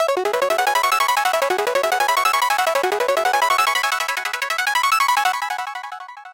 30 ca dnb layers
These are 175 bpm synth layers background music could be brought forward in your mix and used as a synth lead could be used with drum and bass.
atmosphere, bass, beat, club, dance, drum, effect, electro, electronic, fx, house, layers, loop, music, rave, sound, synth, techno, trance